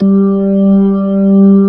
real organ slow rotary
organ, tonewheel, b3